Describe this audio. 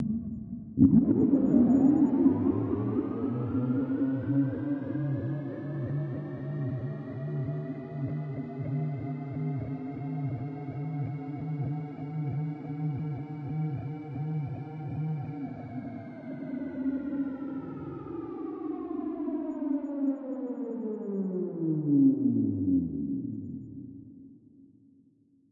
Alien Engine 2
A collection of Science Fiction sounds that reflect Alien spacecraft and strange engine noises. The majority of these noises have a rise and fall to them as if taking off and landing. I hope you like these as much as I enjoyed experimenting with them.
Alien, Electronic, Futuristic, Futuristic-Machines, Landing, Mechanical, Noise, Sci-fi, Space, Spacecraft, Take-off, UFO